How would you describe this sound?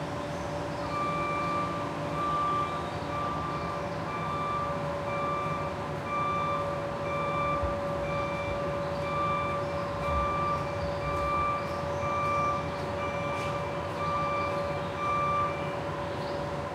20060824.street.cleaning
warning noise of a street-cleaning truck / el sonido de aviso de un camion de limpieza